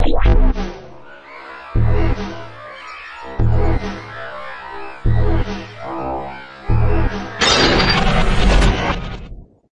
Morph transforms sound effect 18
abstract, atmosphere, background, cinematic, dark, destruction, drone, futuristic, game, glitch, hit, horror, impact, metal, metalic, morph, moves, noise, opening, rise, scary, Sci-fi, stinger, transformation, transformer, transition, woosh